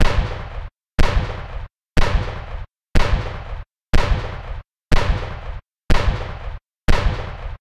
A mono simulation of 8 sequential mine blasts created in response to a request by metalmelter.